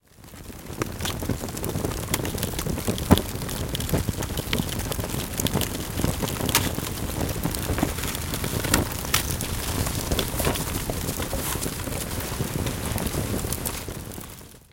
Hořící papír v krbu